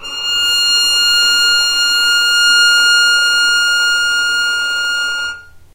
violin arco non vib F5
violin arco non vibrato
non, vibrato, violin, arco